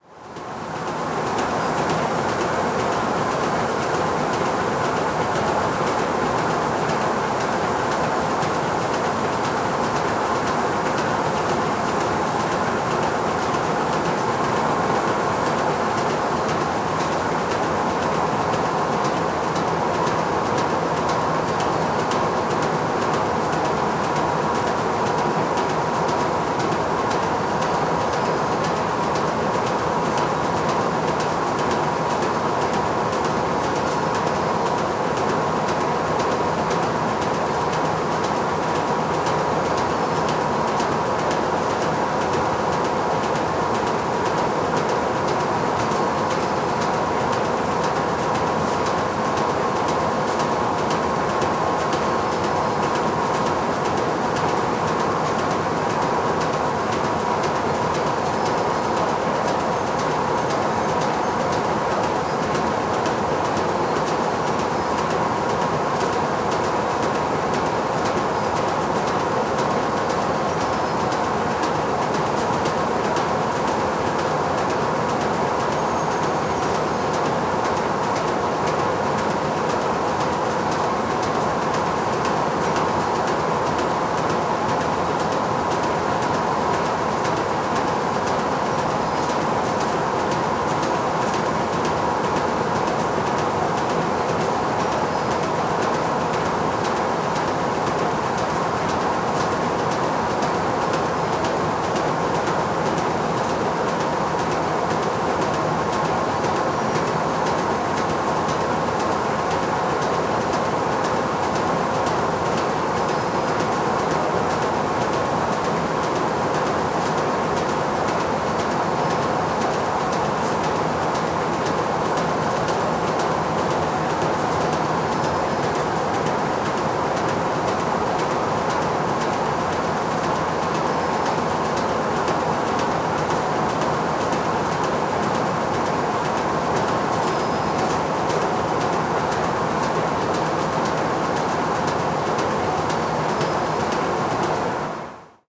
Factory machine 02: mono sound, registered with microphone Sennheiser ME66 and recorder Tascam HD-P2. Brazil, june, 2013. Useful like FX or background.
ambient, atmosphere, background, BG, brazil, cinematic, engine, factory, field-recording, FX, industrial, machine, machinery, motor, Sennheiser-ME-66, Tascam-HD-P2